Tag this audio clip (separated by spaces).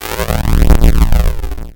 distortion electronic explosion